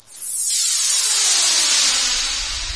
Activate Power
A building sound effect used in a video game when a power was activated.
Synth, Video-Game, SFX, Sweep